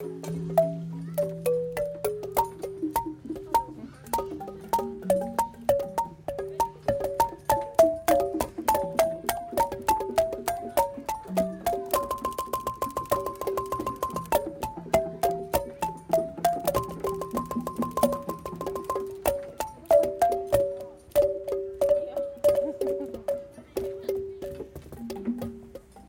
Barton Springs Marimba
Kids playing a marimba in a playground at Barton Springs, Austin, Texas
Recorded 2015-01-24 17-06-43
austin, barton-springs, marimba, playground, texas